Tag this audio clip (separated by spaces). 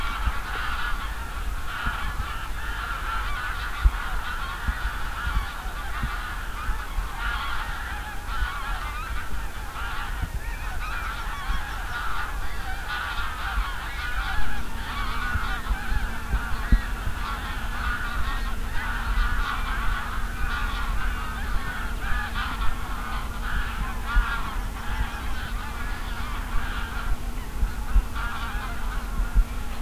ambient birds countryside field-recording geese goose meadow nature